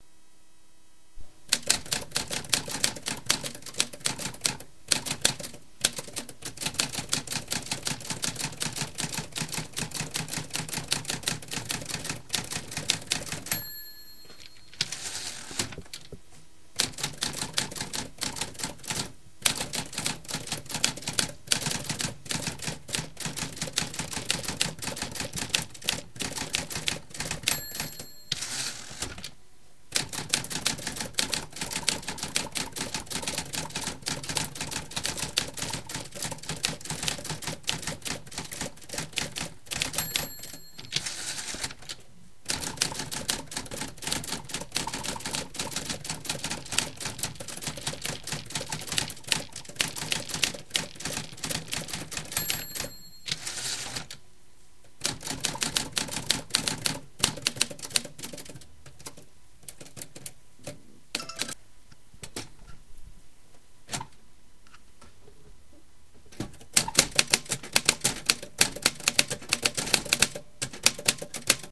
manual typewriter with several end returns